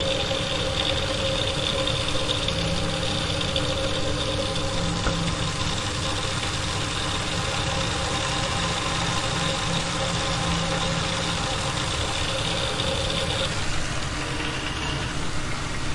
Pressure Meter Pipe Noises 3
Recorded in a high concrete central heating room of a one hundred year old former school building in Amsterdam, near several pipes with pressure meters on them.
system, pipe, hydraulics, construction, liquid, water, pipes, hydraulic, mechanics, heating, mechanical, flow, building, air, pressure